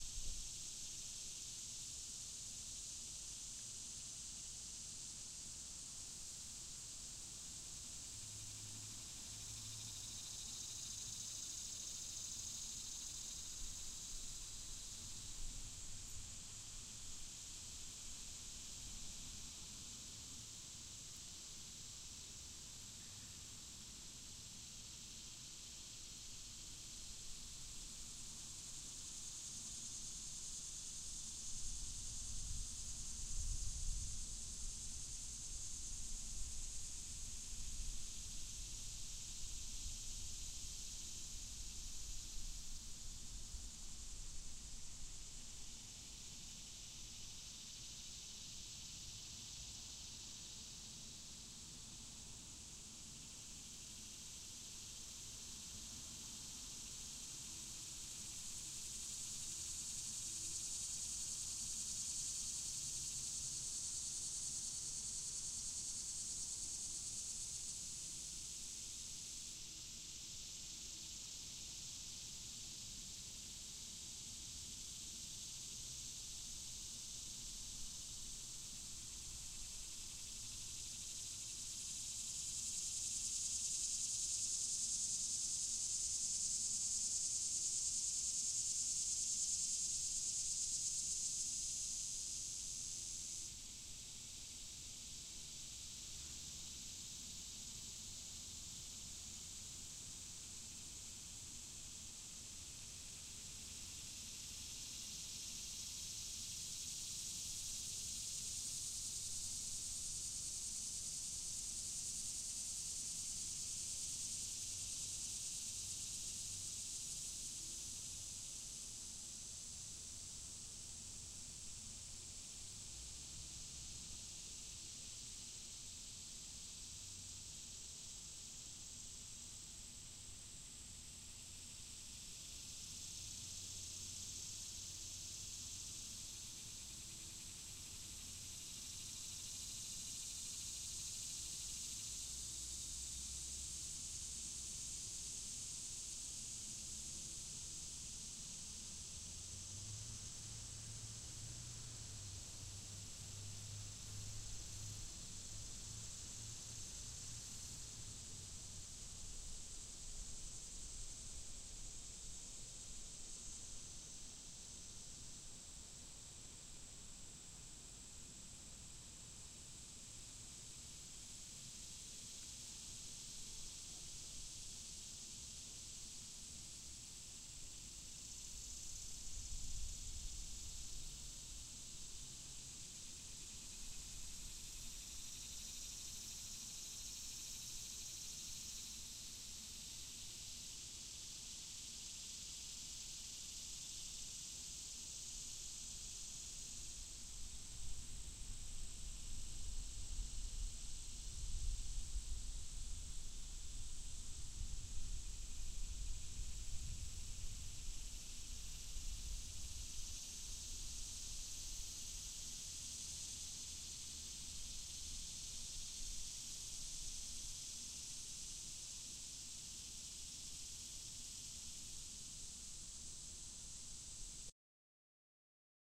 Cicadas of Central Jersey 4
field-recording, cicadas, ambience, h5